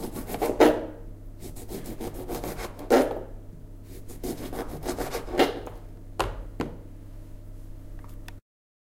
cutting fruit
Cutting a lemon to a pieces.
cutting, bar, pieces